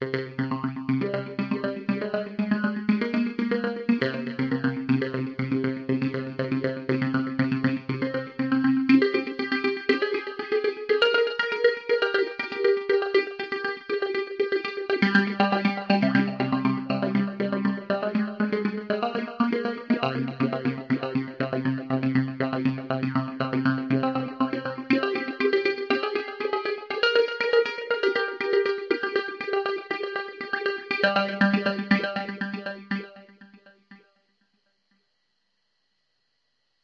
A simple loop with the progression C, G, G#, A#.